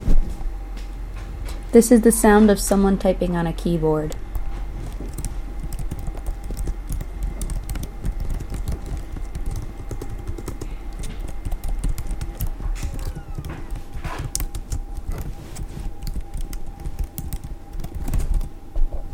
Keyboard Typing
This is the sound of typing on a keyboard on a laptop